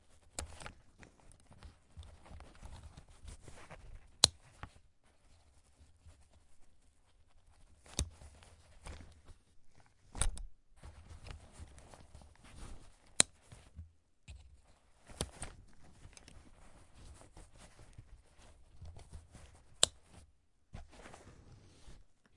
Fitting the snap of a camera bag and opening it.